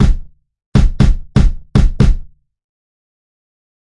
O Boom Kick
I took a kick drum hit from a song I recorded on and tweaked the gate, eq, reverb. Enjoy
kick-drum, dry-kick, vintage-kick